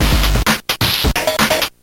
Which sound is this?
LSDJ beat loop
beatbox, breakbeat, drums, gameboy, loop, lsdj, nintendo